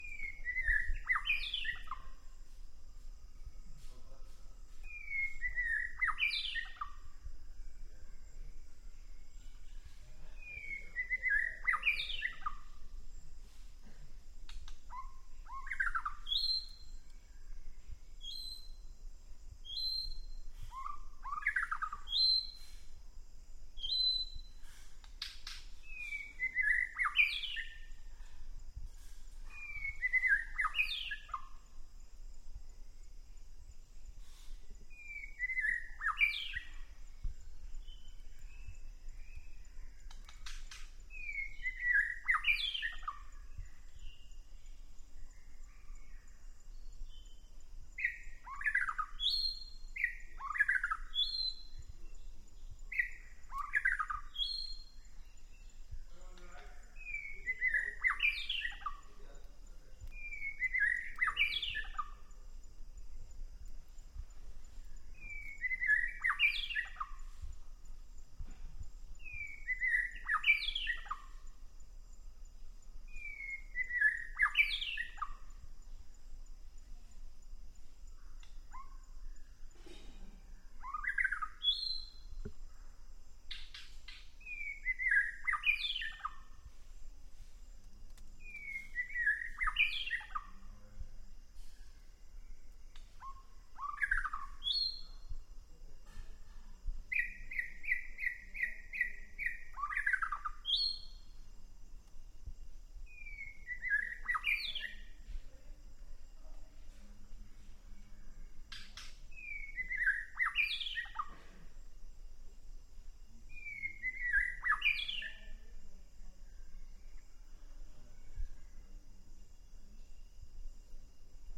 Bird Song 03
Asia, Nature, South, East